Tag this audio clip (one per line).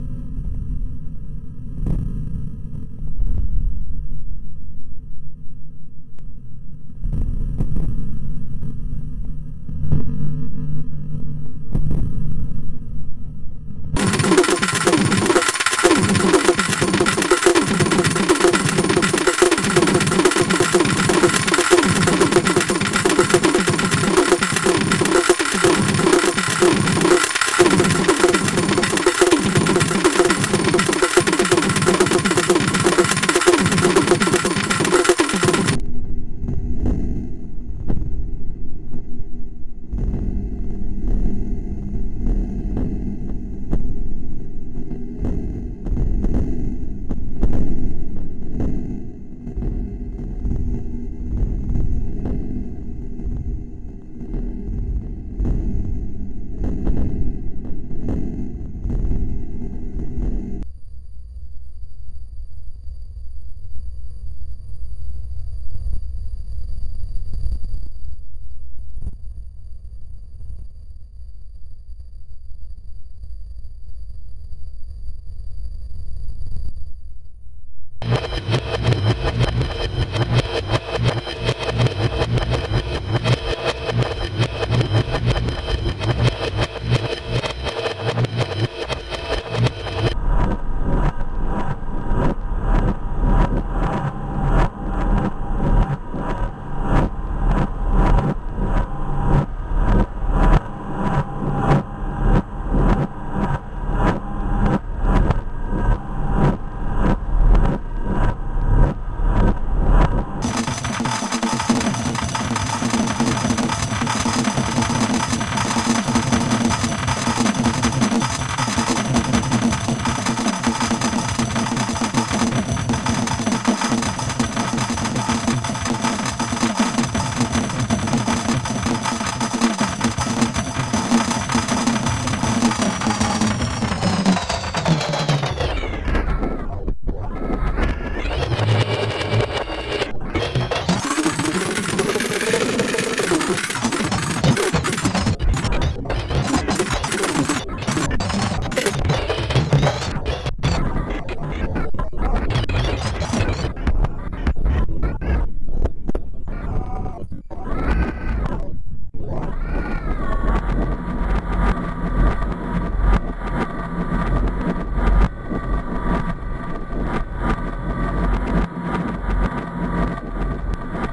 synth
synthetic
digital
sliced
synthesis
synthesizer